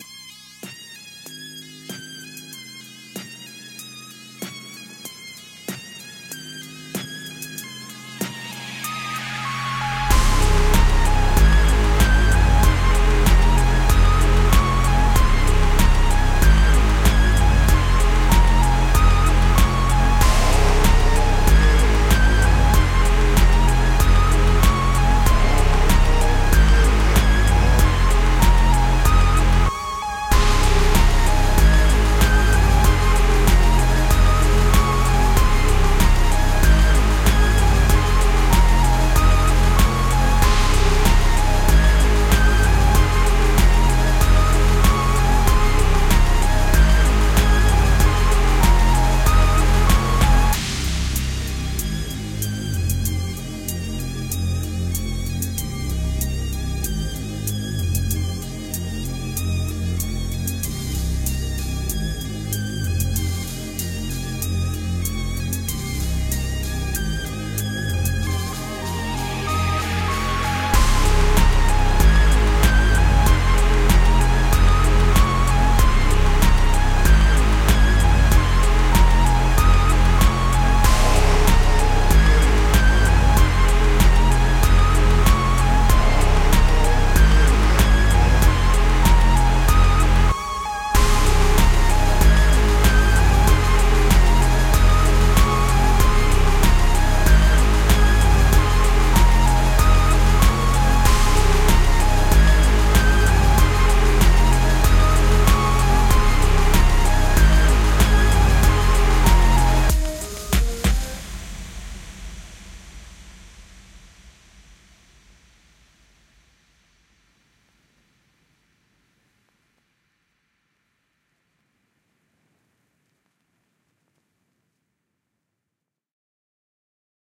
Free Weird Dark Spooky Music
loop, techno, atmosphere, ambient, haunted, loud, loops, spooky, club, rave, dark, trance, house, dance, sound, electronic, electro, music